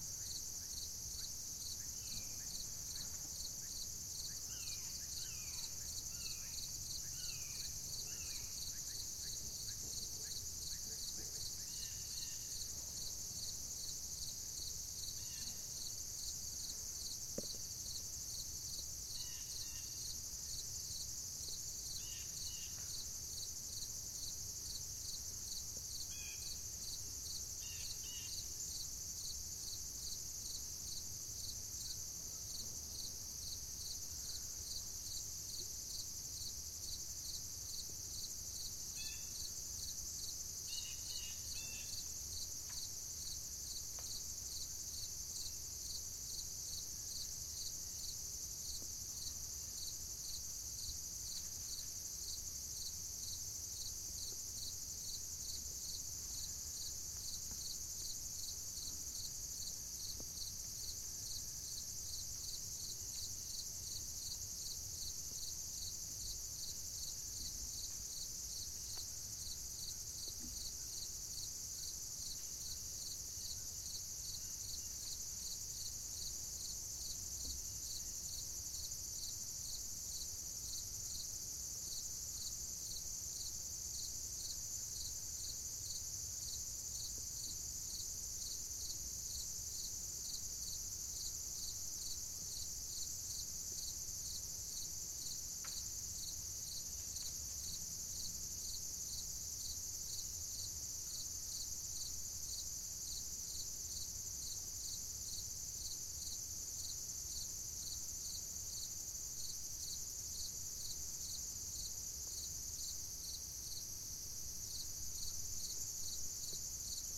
country morning late September
New England autumn, early morning - a lone cricket in foreground, chorusing katydids in background, occasional jays and other birds in distance. Recorded around 7 am, 2017 Sep 26, Fitzwilliam NH (USA) with a tripod-mounted Tascam DR-40 (built-in mics).